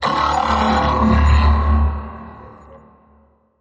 Robotic - scream
Recreation of a sound effect from King Crimson's "The Deception of the Thrush". Bass synth, distortion, vocoder, reverb.
dark, quote, robot, robotic, synthetic, villain, vocal